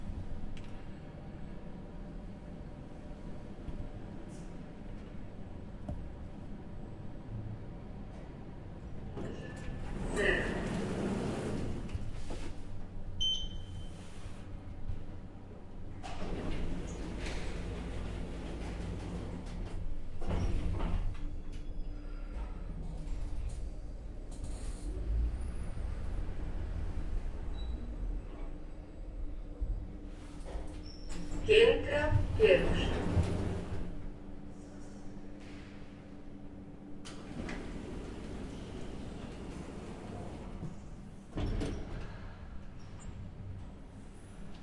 Elevator quiet, with voice communication
communication, Elevator, quiet, voice